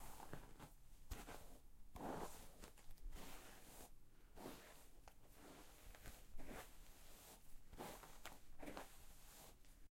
Brush hair
Brushing hair with a hairbrush.
OWI, brush-hair, brush, Hair, brushing, long-hair